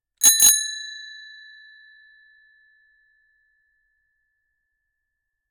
bicycle bell 01
Sound of a bicycle bell. Recorded with the Rode NTG-3 and the Fostex FR2-LE.
bike, cycle